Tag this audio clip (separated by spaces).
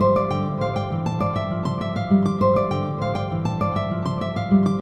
nylon 100bpm picked melodic loop sequence